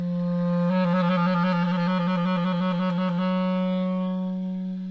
A clarinet sound of a F2 (175 Hz) anf F#2 (185 Hz) performing a vibrato.
Clarinet, mono, F, F2, 2